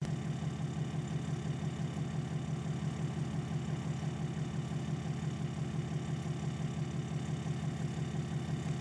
Truck-Diesel 10dodge idle
truck, diesel